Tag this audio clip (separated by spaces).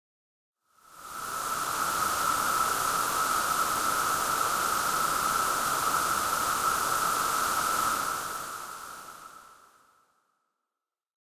Free Edited Mastered